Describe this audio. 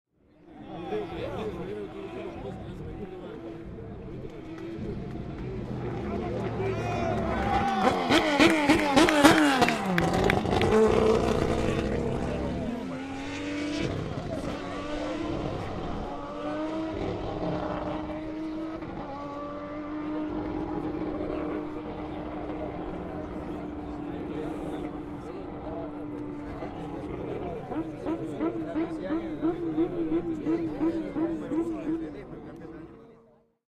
TC.Salta.08.RevvingUp.PatoSilva
field-recording, car, race, engine, zoomh4, sound, revving, turismo-carretera, crowd